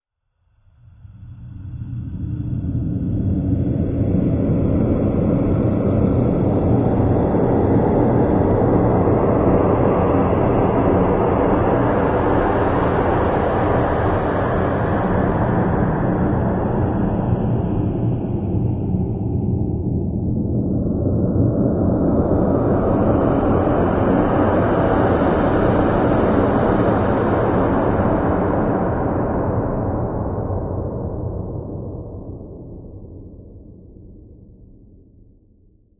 Compilation of (processed) whispers, breaths and synths to obtain short audio-fragments for scene with suspense in a flash-based app with shadows.